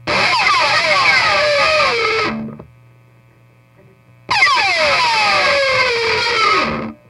Two pick slides from my guitar. Maybe they're both useful but I like the second one more.